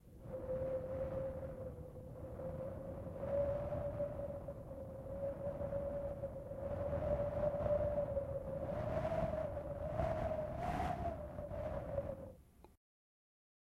Wind Arctic Storm Breeze-002
Winter is coming and so i created some cold winterbreeze sounds. It's getting cold in here!
Wind, Arctic, Windy, Breeze, Storm